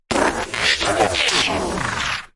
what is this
This sound was created by processing my own footsteps with a combination of stuttered feedback delay, filter modulation (notched bandpass + lowpass LFO), and distortion (noise carrier + bit crushing).
factory, futuristic, sci-fi, machine, motor, robotic, noise, industrial, robot, mechanical, drone, machinery, engine